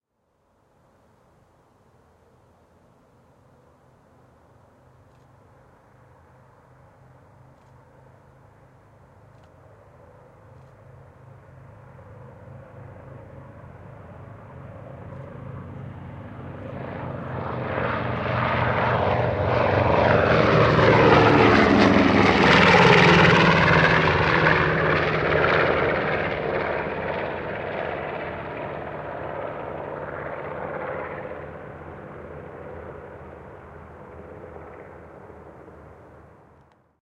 B-25 Mitchell Flyby
This is a recording of a B-25D Mitchell flying nearly directly overhead shortly after takeoff.